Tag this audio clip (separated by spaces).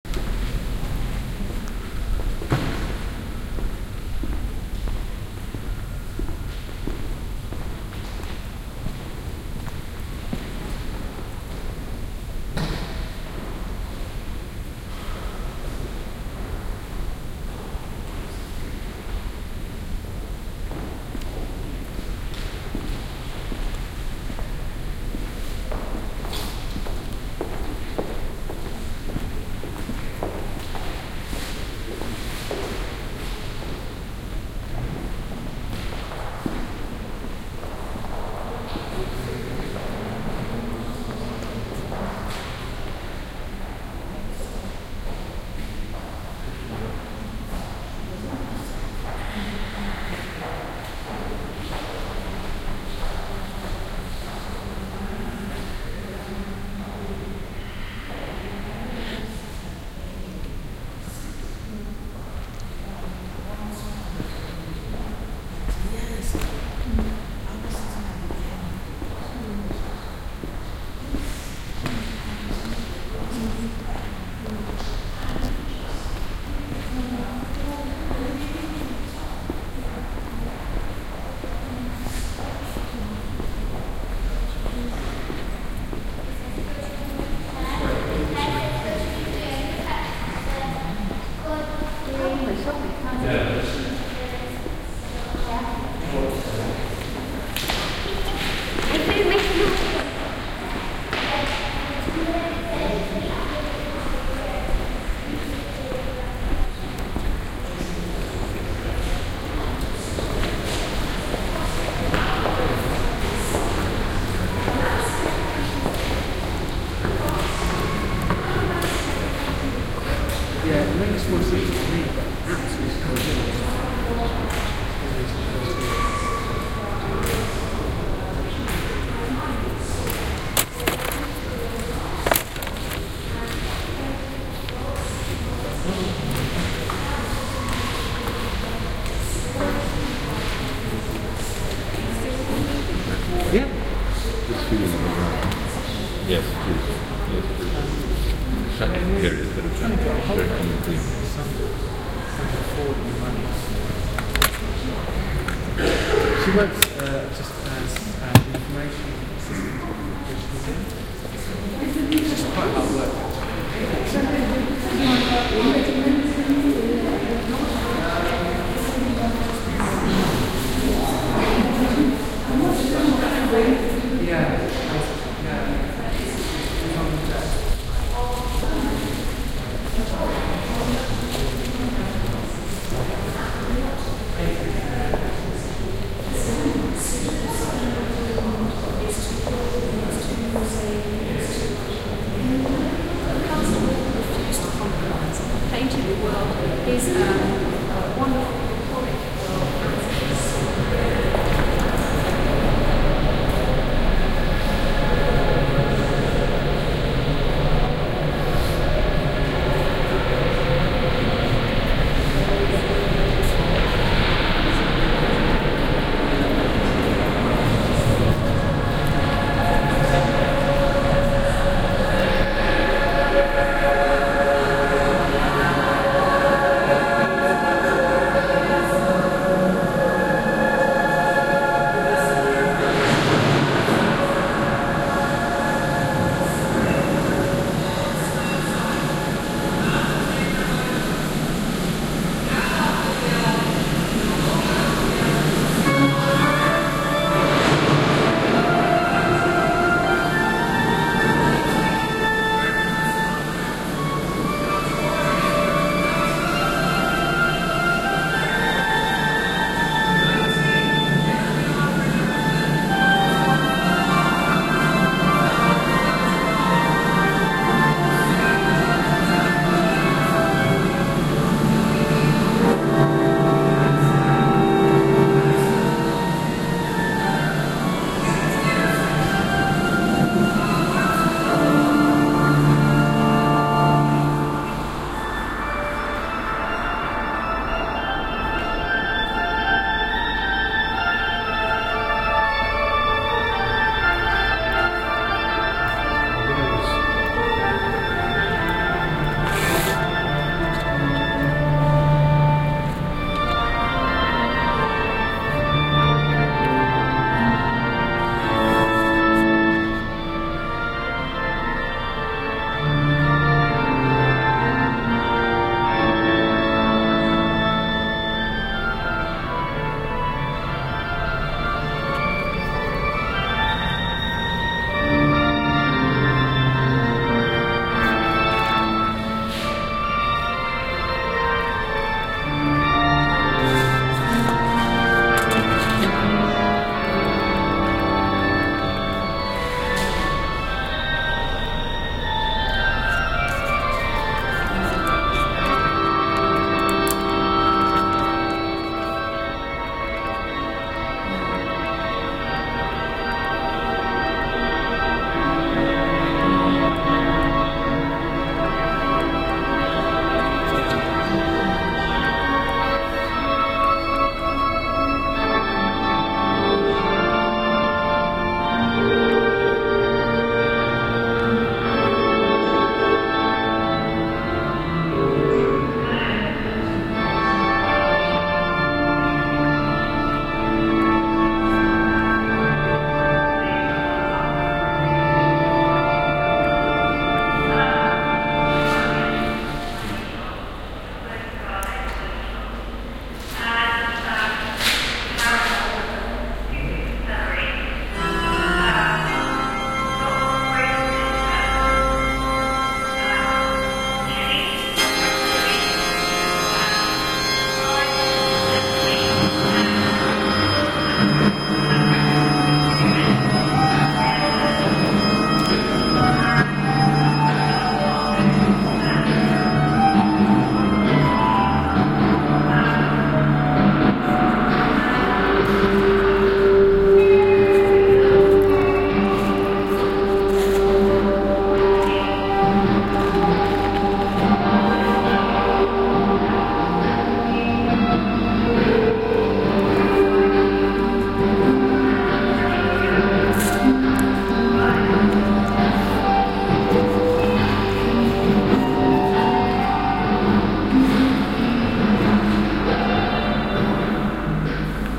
city atmosphere ambient soundscape ambience